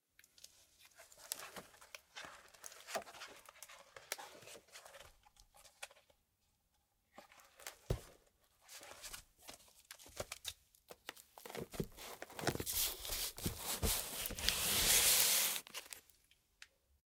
Recorded with a Behringer B-1, this is the sound of an old book being handled.